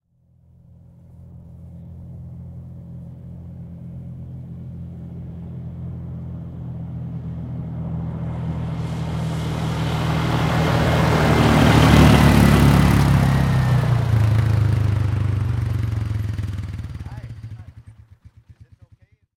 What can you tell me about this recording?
Honda ATV pass by fast close good detail mono
Honda, pass, ATV